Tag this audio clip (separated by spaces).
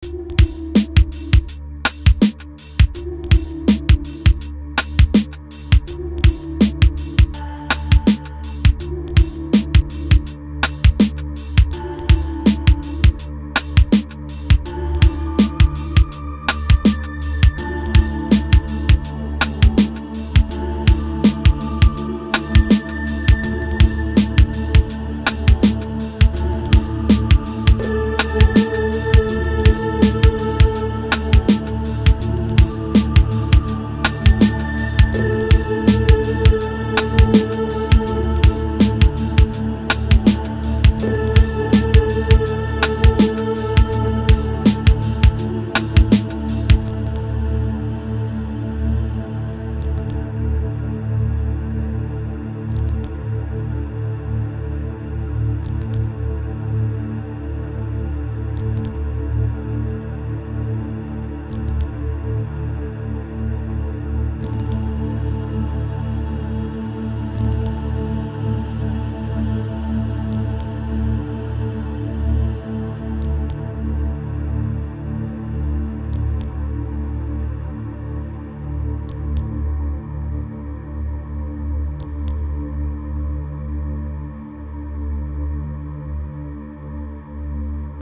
chillout cyber d e f love meh my-loss processed sci-fi Tin-flute type-beat Valentines-Day vocals